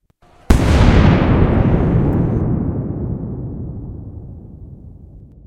an explosion
Want to use this sound?